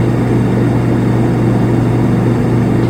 AMB-Fridge-Idle-03
The hum of a refrigerator.